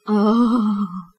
while trying to narrate my yaoi fictions, sometimes i'll become overwhelmed by how... um... CUTE things get, so I decided to save my little sighs